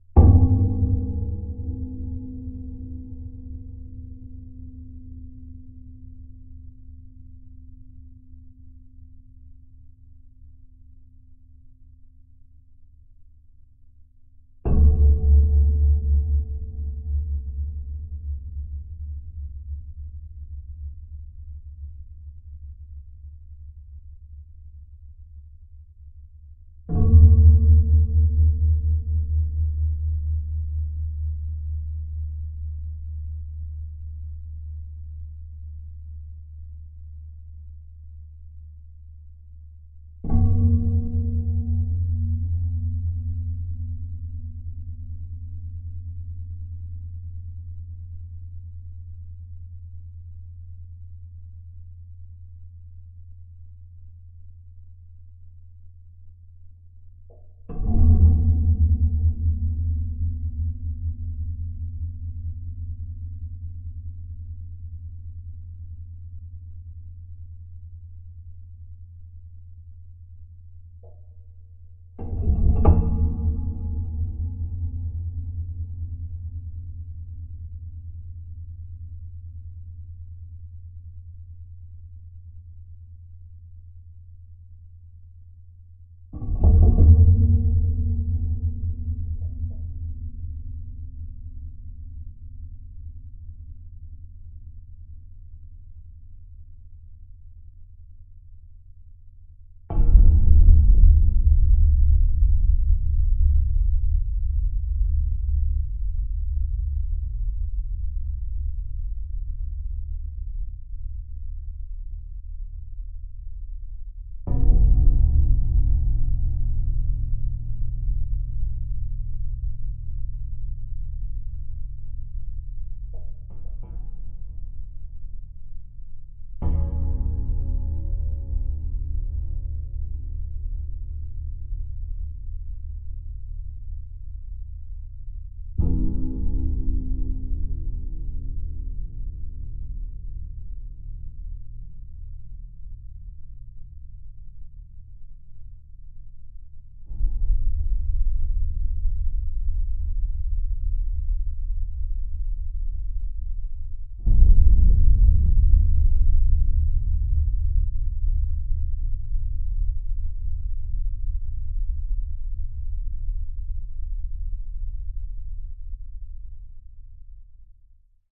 Contact mic recording of a large folding clothes hanger/dryer. Tapped and plucked on the stems for hanging clothes to create some weird drone/percussion hits.
Recorded with a LOM Geofon contact microphone into a Sony PCM-A10 handheld recorder.